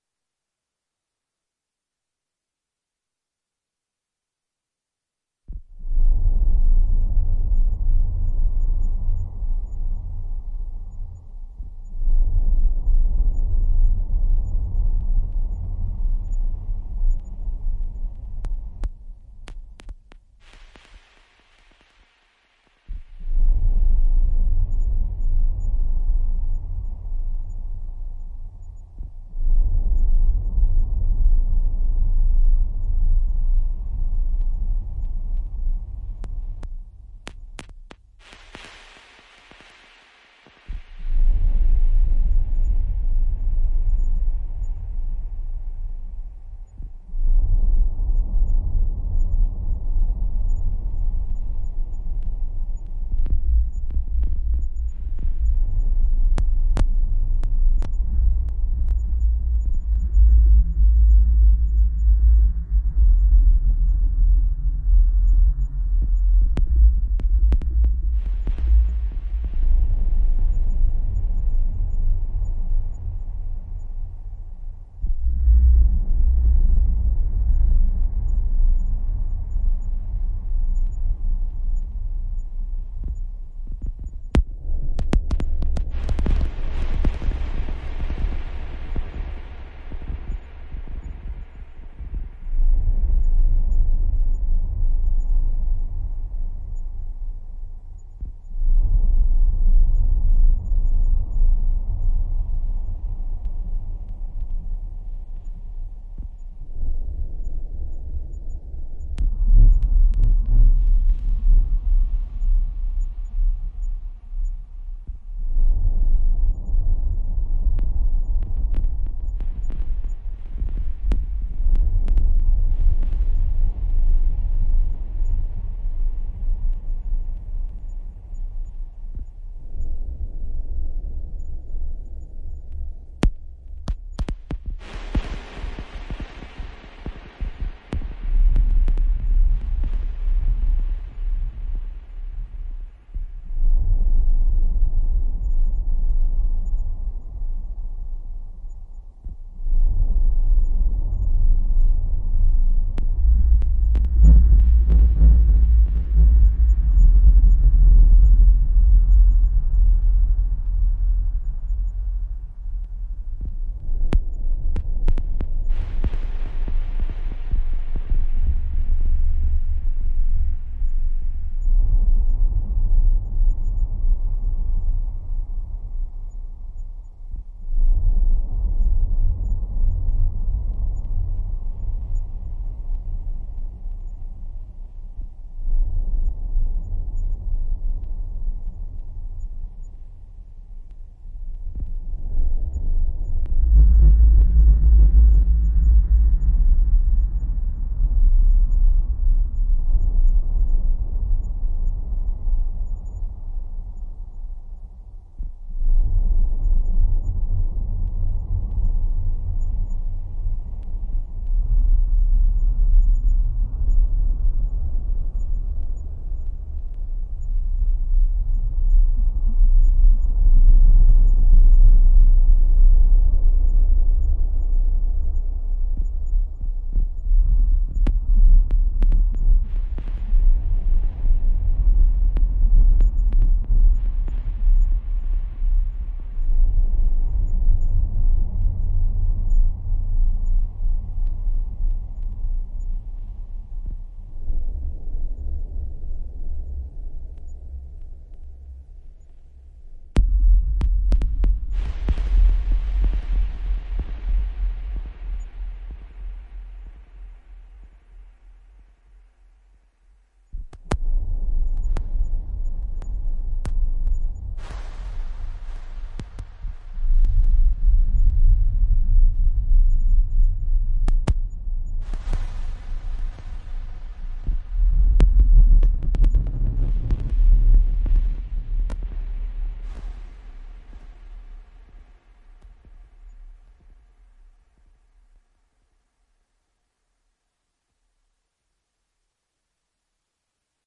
Paralell (Echoflux reconstruction)
I only use a Triple OSC and I took an advantage of the several ASIO bugs of my soundcard. I don't mix the sound. I've re-recorded that shape 17 times, after that, I applied massive echo and reverb filters.
3 OSC, echo, reverb, compressor.
For this record, I've deleted the major part of the notes.
Some new settings of the echo filter, are applied.
cavernous, click, filter, osc, paralell, sub